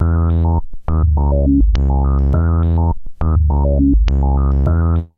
SH-5-259 103bpm
Sample and Hold + VCF and manual filter sweeps
filter, hold, vcf, roland, analog, sh-5, sample, synthesizer